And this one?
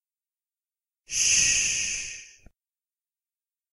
Just a quick "shh" sound. Good for starting something off.